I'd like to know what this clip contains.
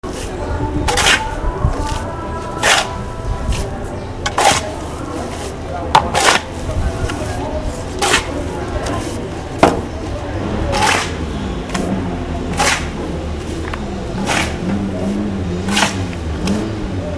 Som de pá mexendo cimento com água para contrução.
Data: 10/jun/2016
Horário: 14:22
Gravado com gravador de mão Sony PX820
Som captado por: Reifra Araújo e Larissa Azevedo
Este som faz parte do Mapa Sonoro de Cachoeira
Iron shovel stirring cement with water.
Date: Jun/10/2016
Time: 2:35 P.M.
Recorded with handy recorder Sony PX820
Sound recorded by: Reifra Araújo and Larissa Azevedo
This sound is part of the Sound Map de Cachoeira
iron, vozes, p, brazil, cimento, cachoeira, voices, brasil, shovel, cement, bahia